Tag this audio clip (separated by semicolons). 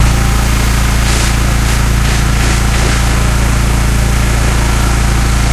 field-recording; gun; wind; birds; plane